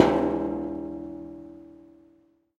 Recordings of different percussive sounds from abandoned small wave power plant. Tascam DR-100.
ambient, fx, hit, drum, percussion